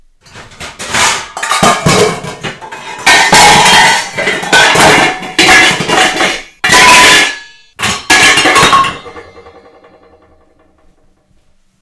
Noise produced with saucepan lids in the kitchen.
Metallic sounds.
Mic sE4400a, APOGEE duet, MacBook Pro, Audacity.
unpleasent, metallic, noise, kitchen, lids